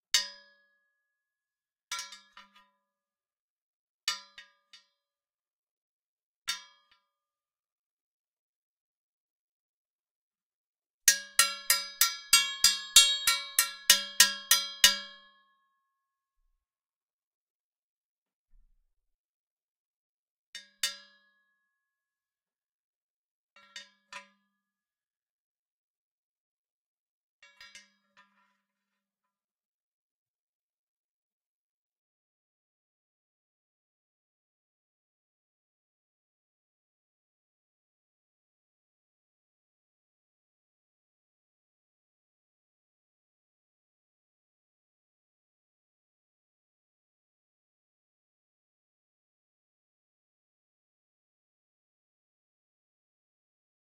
Different hit with metal stick on a steel wheel